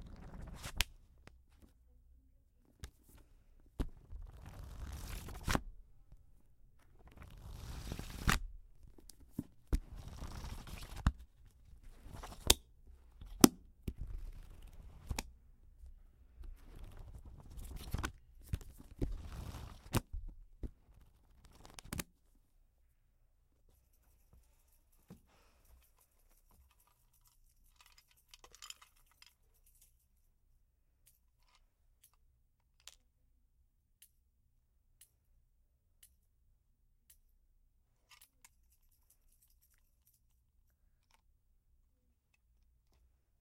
Sound Book 2
Folhei paginas de um livro, microfone condensador
Gravado para a disciplina de Captação e Edição de Áudio do curso Rádio, TV e Internet, Universidade Anhembi Morumbi. São Paulo-SP.
Book, page, paper